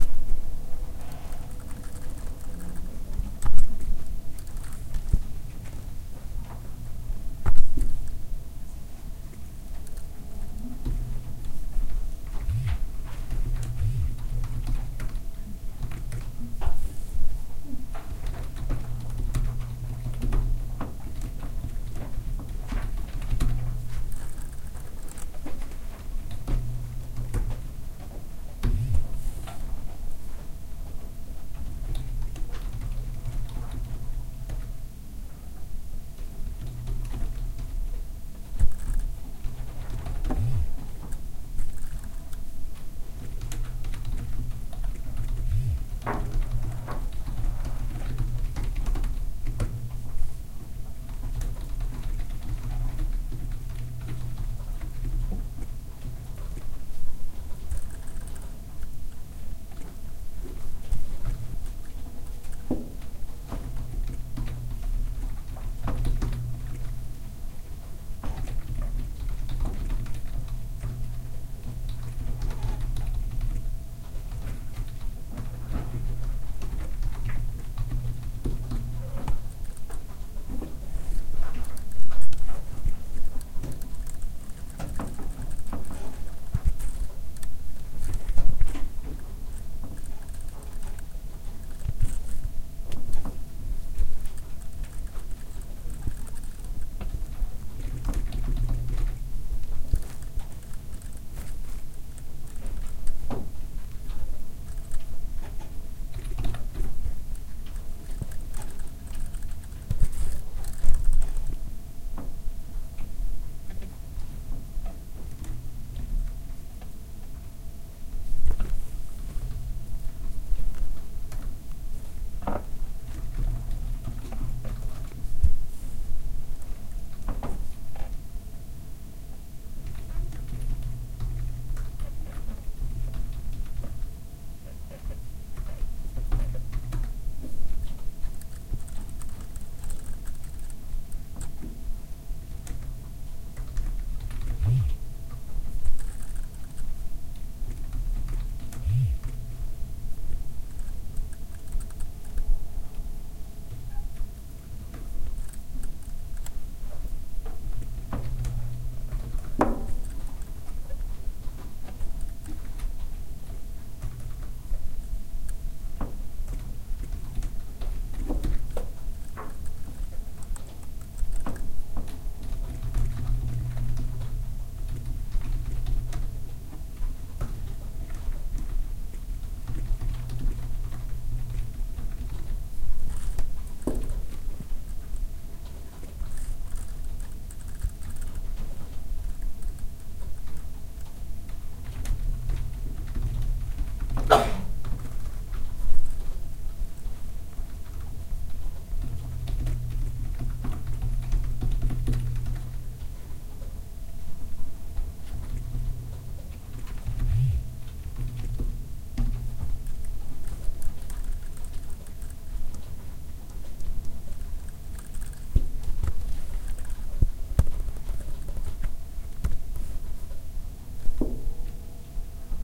In college English class. Writing the essay part of my final using the braille sense U2. Recorder in pocket r-05.
braille, class, college, display, essay, note, notes, note-taker, qwerty, spelling, typing, vibration, vibrations, writing